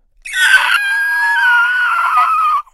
Monster scream 1
A monster/zombie sound, yay! I guess my neighbors are concerned about a zombie invasion now (I recorded my monster sounds in my closet).
Recorded with a RØDE NT-2A.
Apocalypse, Creature, Dead, Growl, Horror, Invasion, Monster, Monsters, Scary, Scream, Zombie